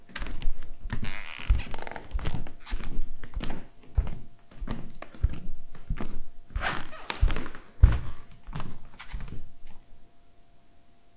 footsteps bare feet
Walking around my apartment in bare feet.
Recorded with Rode NTG-2 mic into Zoom H4 and edited with Spark XL.
walk, floor